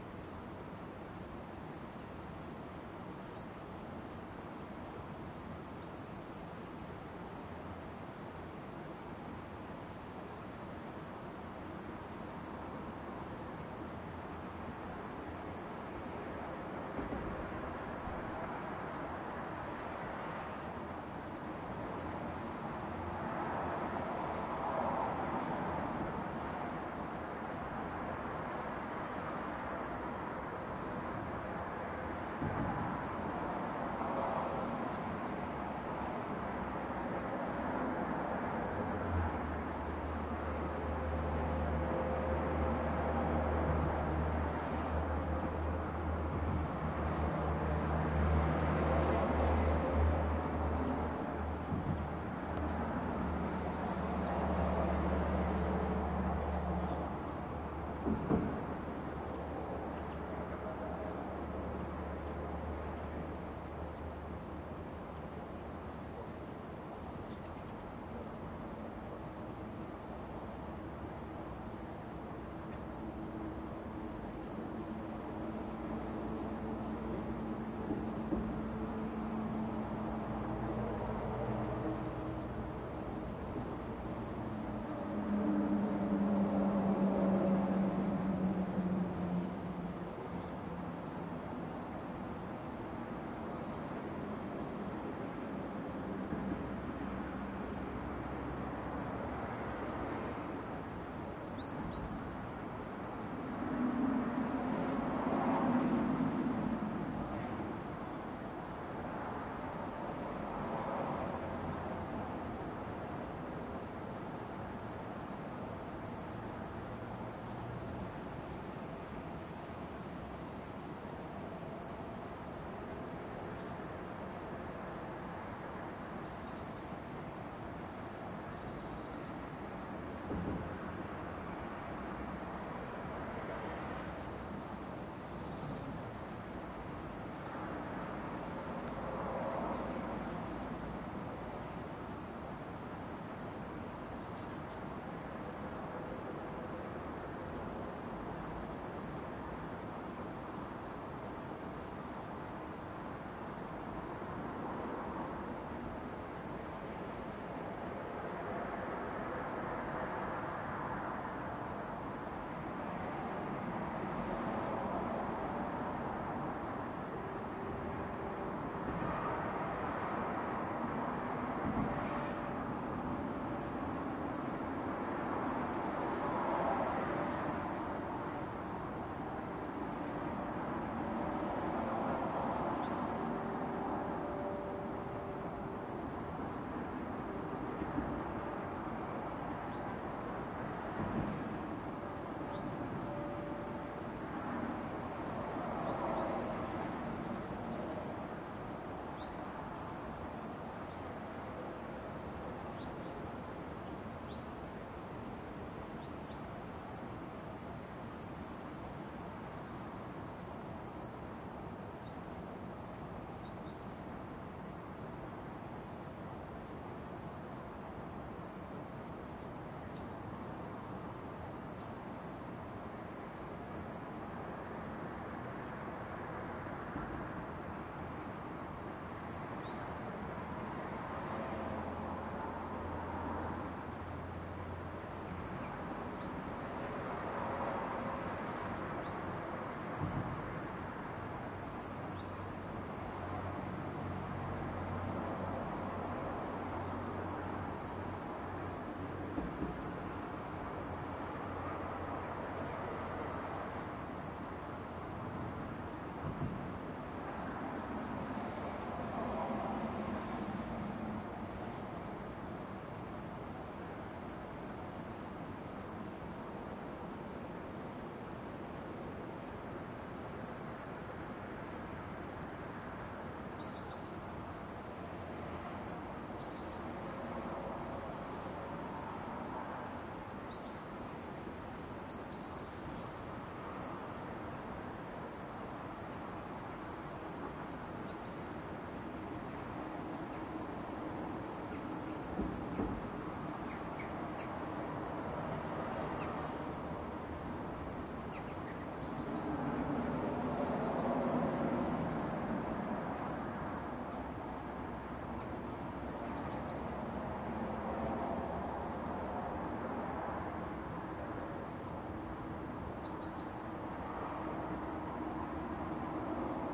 oulu 041008 makparveke
evening, flickr, oulu, toivoniemi, trafficsound, zoomh2